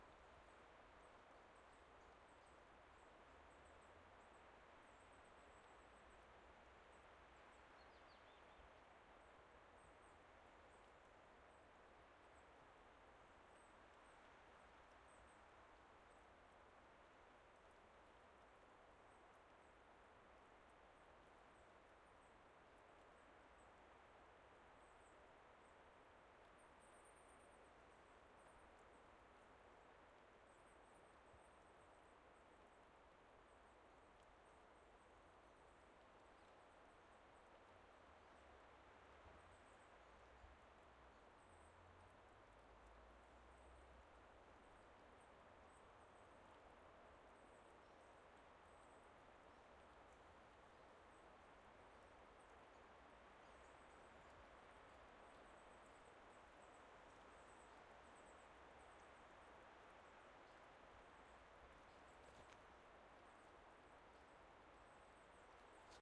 Forest atmos
Quiet woodland, distant 'white noise' of high tree branches swaying in the wind.
Recorded on a stereo Audio Technica BP4025 into a Zoom F8 Mixer
woods, atmosphere, ambience, trees, forest, woodland, atmos, wind